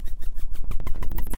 High Pressure Wobble
Wobbly, electro glitch sound.
bend, bending, circuit, circuitry, glitch, idm, noise, sleep-drone, tweak